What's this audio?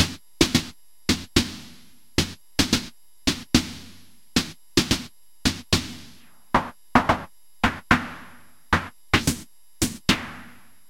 snare line done with a mam adx-1. played by a midisequence by a mam sq-16.